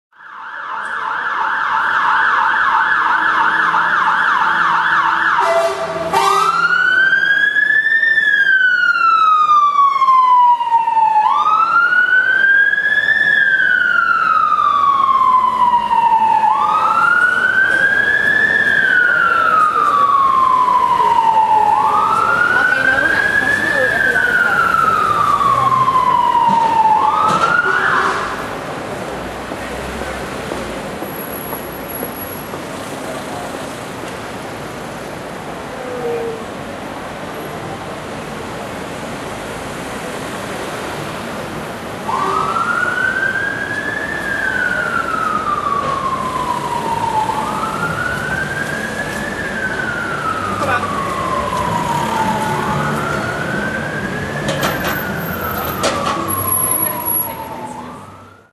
Fire Brigade Siren - Street - Cars
The loud and clear sound of a fire brigade siren, recorded with Canon Legria camcorder, in-built mic.